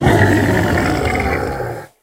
Large Monster Death 02
Death sound for a large creature.
growl, beast, creature, monster, grunt, hit, horror, roar